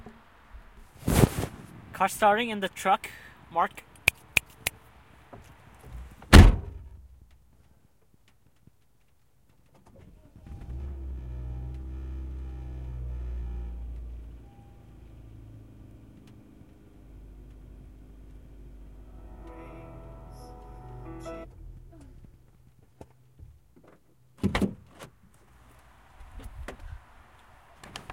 4civic trunk
Stereo, H4N
In a small sedan, cloth seats, in a parking lot by the highway. Engine starting in a Honda Civic from inside the cloth-lined trunk.